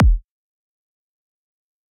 SwarajiwaTH Kick
Kick/bass drum